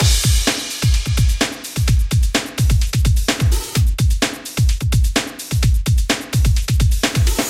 Energetic drum loop suitable for styles such as Big Beat, Drum and Bass, sport and action music.